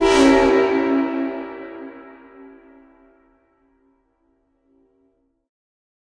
Diesel locomotive horn
air-horn, blast, diesel-locomotive, edison, horn, remix, train-horn, twin-horn